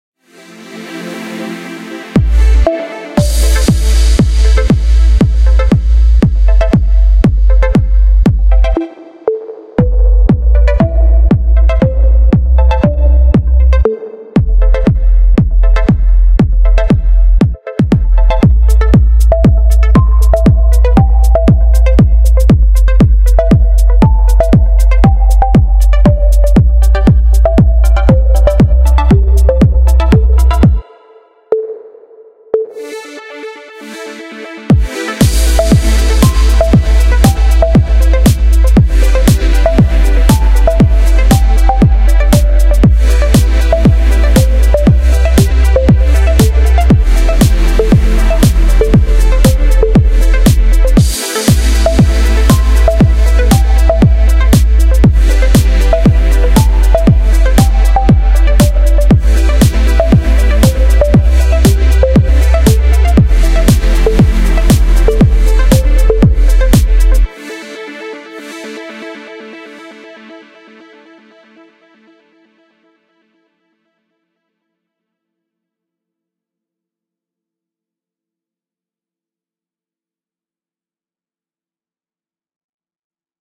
HV Loops (2014) - This Past
!SO HERE THEY ARE!
There is no theme set for genre's, just 1 minute or so for each loop, for you to do what ya like with :)
Thanks for all the emails from people using my loops. It honestly makes me the happiest guy to know people are using my sound for some cool vids. N1! :D
x=X
bass, compression, electronic, eq, full-loop, fx, hats, kick, lead, limiter, loop, mastering, mix, pads, snare, synths